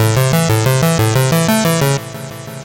Ok, I am trying to make an epic 91 bpm neo classical instrumental and needed galloping synth triplets. This is what I got. Some were made with careless mistakes like the swing function turned up on the drum machine and the tempo was set to 89 on a few of the synth loops. This should result in a slight humanization and organic flavor.
synth, loop, 91, bpm